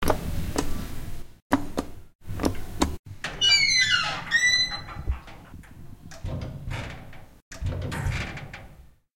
elevator button door
3 Elevator calling button, and outer door open/close sounds.
close, button, open, door, elevator